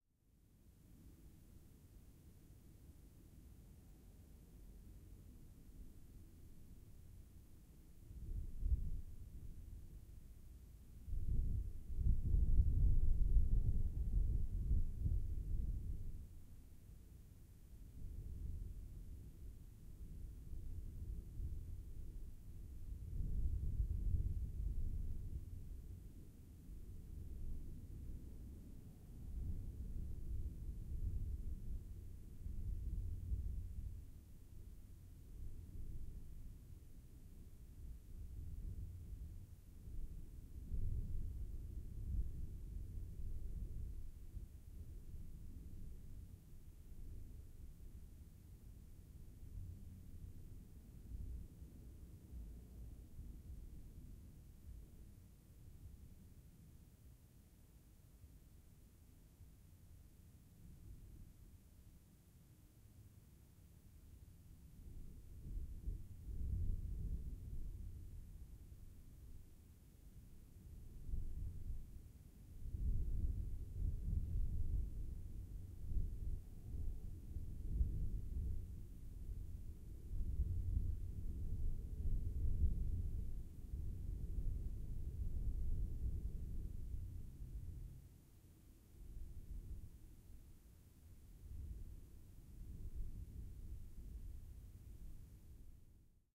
The wind rushing over the top of a chimney as heard from the hearth of an open fire. The fire isn't lit, it's just the wind that can be heard.
Tascam DR-22WL, internal mics, 15Hz low cut